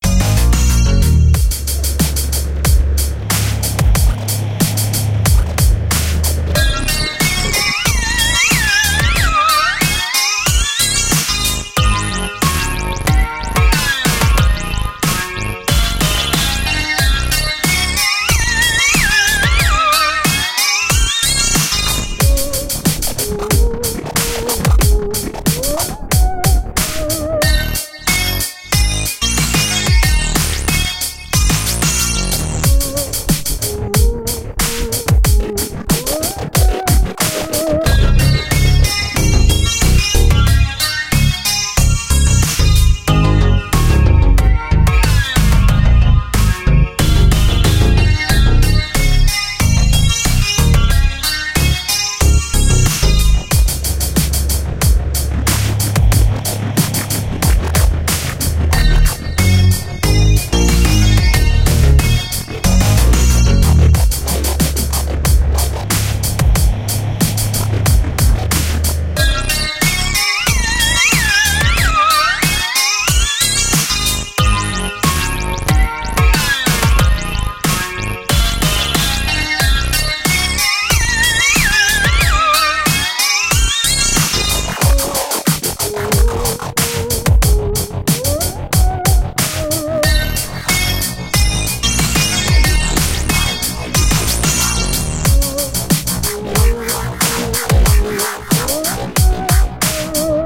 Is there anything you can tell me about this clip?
Yo Yo Yo, I made some nice hot Chicken Loop for ya'll on this chilly winter day. And I'm servin' it up at 92 BPM. So kick on back and enjoy.